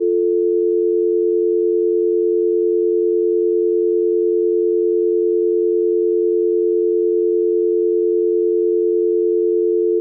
Dial Tone
Tone generated on a landline phone when phone line is active and ready for use. Created from scratch using signal generators.